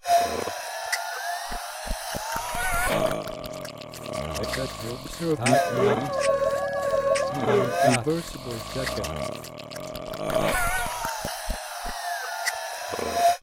A short audio palindrome: The files sounds exactly the same when played in reverse.